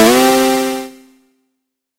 Retro Game Sounds SFX 155
effect, shooting, weapon